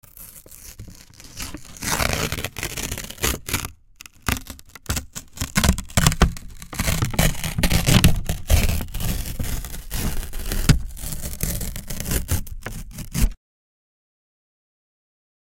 09 Tehoste paperinrepiminen9
A single paper rip